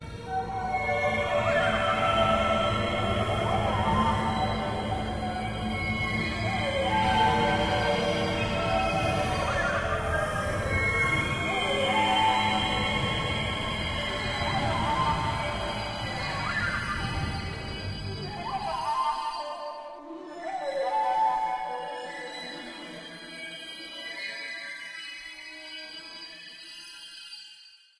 my apologies forehand to those of you whom recognize their sounds.
I will try an backtrace my steps and find the individual sounds i used to create this.
it is a mish mash of several sounds to try and create an eerie atmosphere of withces dancing around the bonfires at beltane.